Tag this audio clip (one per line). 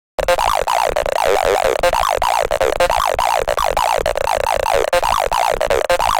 rythms
drumloops
extreme
idm
processed
sliced
drums
experimental
hardcore
acid
breakbeat
electro
electronica
glitch